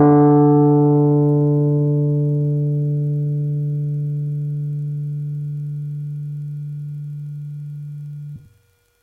just the single note. no effect.
note, rhodes